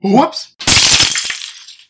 Welp I threw a lego build at the ground with all my might and it sounds like glass shattering.